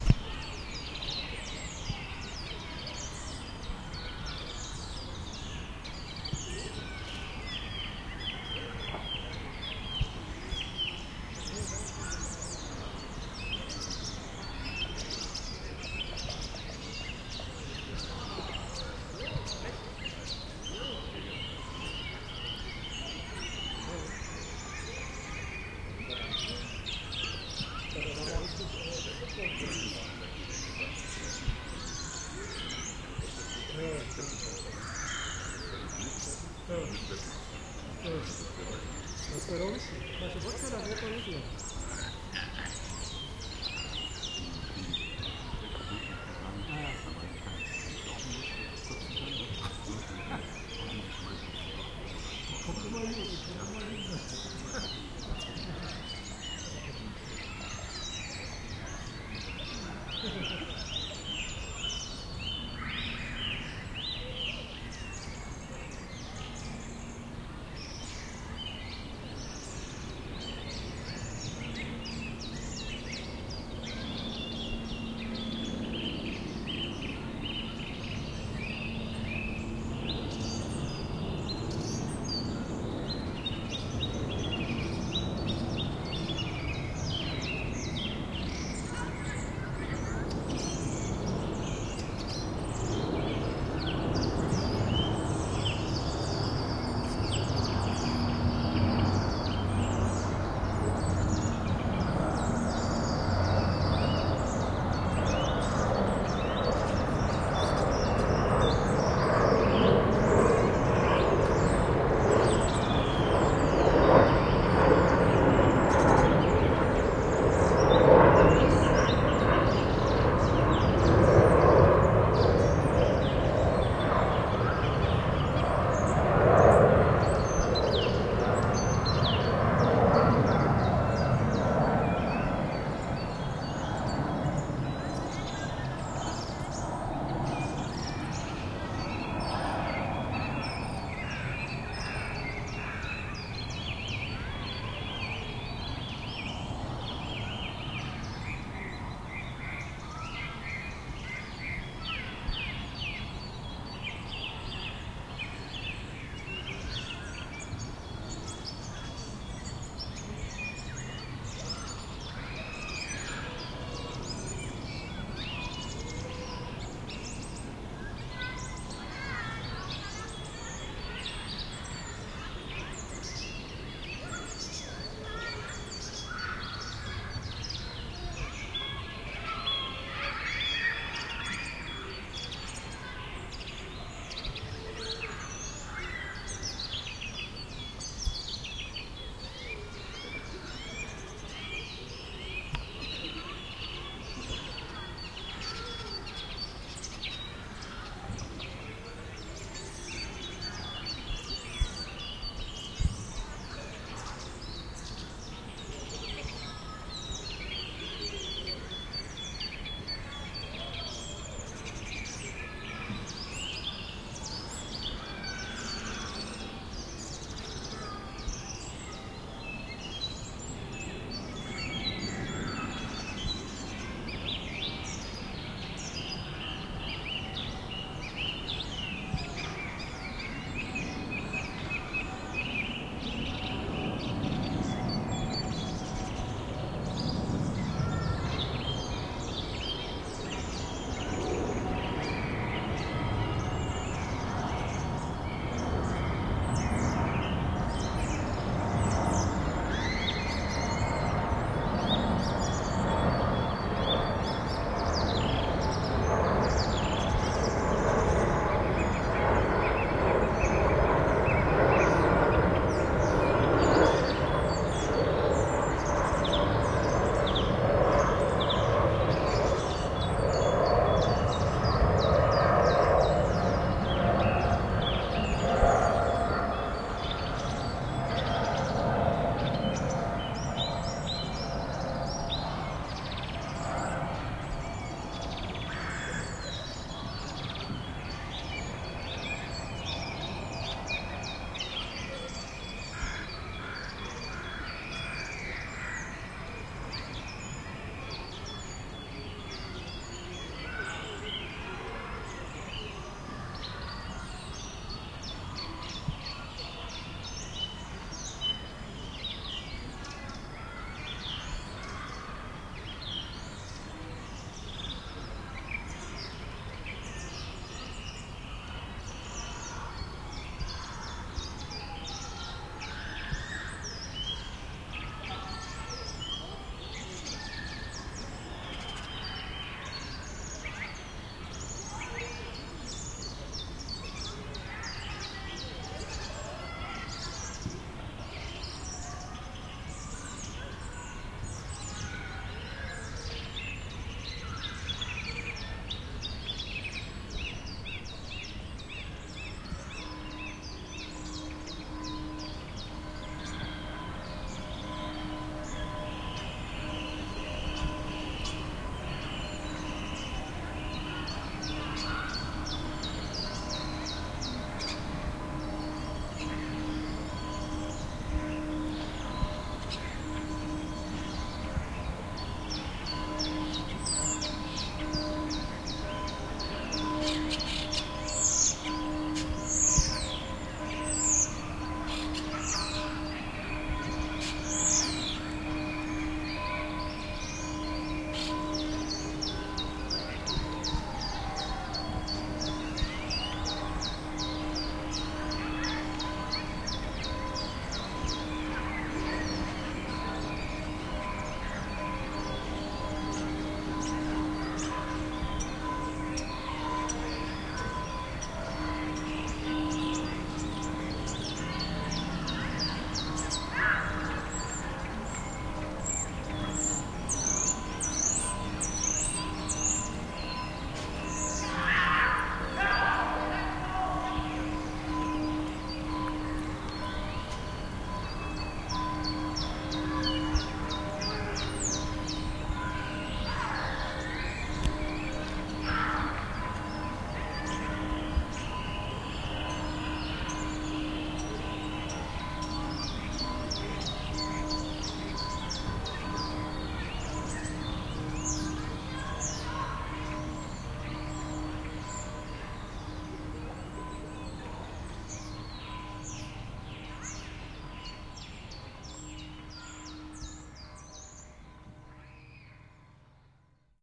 Park Ambient Berlin Pankow
Recorded on a sunny afternoon at Berlin Pankow Park.
Lots of bird sounds, kids playing in the background and people chatting. 2 Airplanes and churchbells at the end.
Park, Public, Town, Parksounds, Urban, People